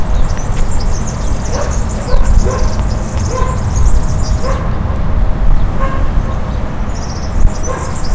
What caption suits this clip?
Ahí vam anar al parc del fondos del peixo i vam fer una activitat relacionada amb el sons(en particular) i vam grabar un gafarró.
bird, deltasona, el-prat, field-recording, gafarro, park, serin